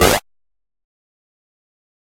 A short electronic sound effect similar to "Attack Zound-47" but a bit more noisy. This sound was created using the Waldorf Attack VSTi within Cubase SX.